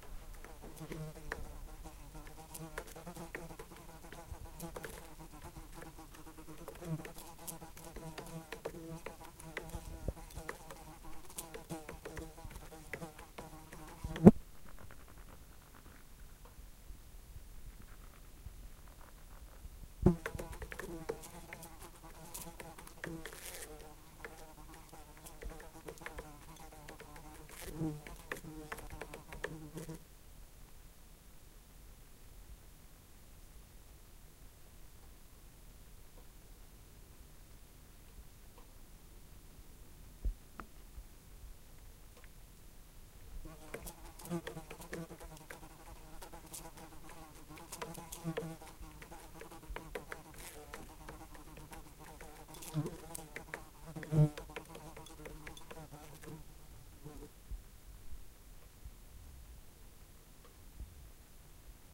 Fly in Lampshade 001
A simple stereo recording of a fly buzzing around in a lampshade suspended from the ceiling. Recorded using a sony stereo mic and mini-disk.
bumping, Fly, insect, lampshade